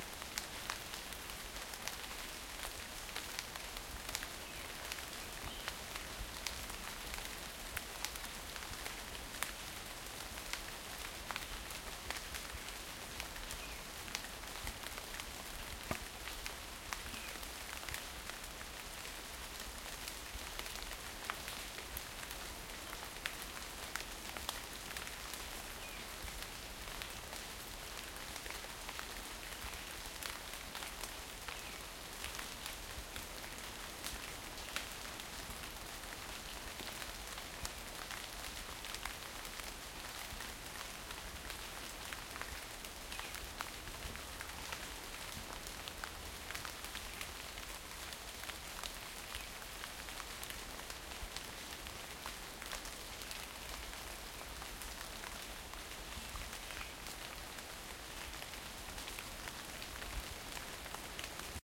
A light rain falls in a tropical forest of Reunion Island (near St Rose), with many sounds of drops hitting leaves, trunks, etc.
Recorded with : Zoom H1 stereo mic (1st gen)